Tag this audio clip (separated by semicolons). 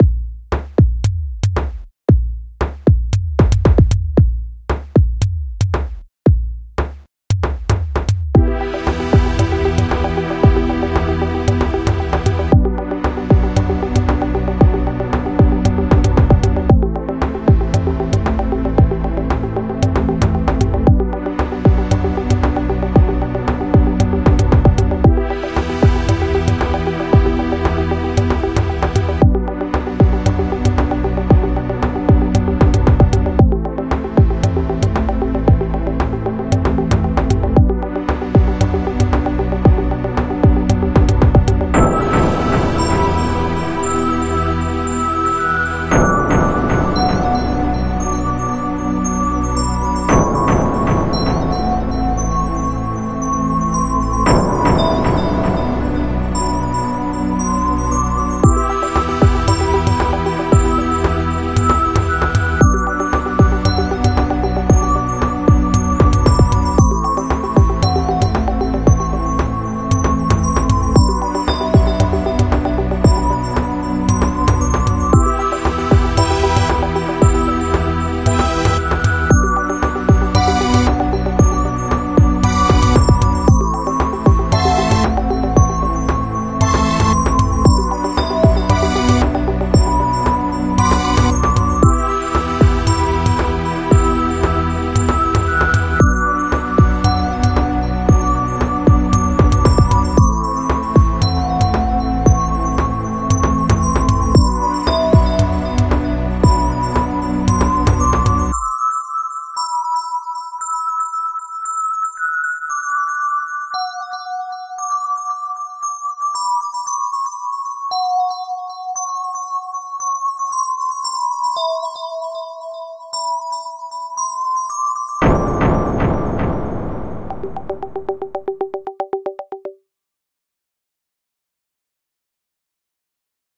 instrumental; loop